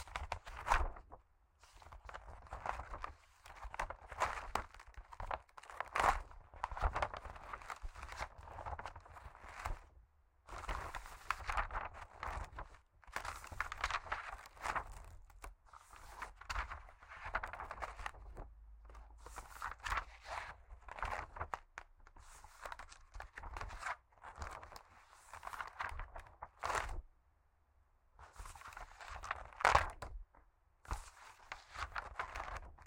Flipping Papers
flipping pages, 90 page wide edition
book, read, reading, paper, pages, flip